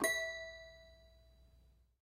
This lovely little toy piano has been a member of my parent’s home since before I can remember. These days it falls under the jurisdiction of my 4-year old niece, who was ever so kind as to allow me to record it!
It has a fabulous tinkling and out-of-tune carnival sort of sound, and I wanted to capture that before the piano was destroyed altogether.
Enjoy!
packs, toy-piano, sounds, Carnival, Piano, Circus, Toy